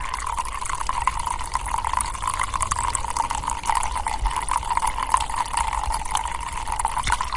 Solar water cascade
A solar powered cascade of water flowing into a succession of bowls